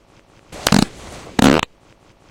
fart poot gas flatulence flatulation explosion noise weird space
explosion, fart, flatulation, flatulence, gas, noise, poot, space, weird